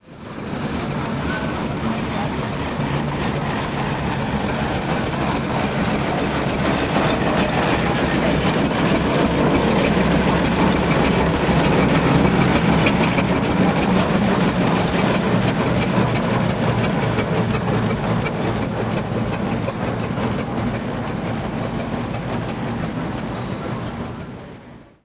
Passing Steam Traction Engine 1
Vintage British Steam Traction-engine at show